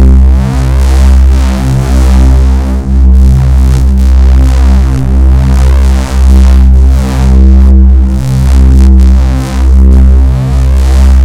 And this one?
ABRSV RCS 033
Driven reece bass, recorded in C, cycled (with loop points)
driven, drum-n-bass, bass, reece, heavy, harsh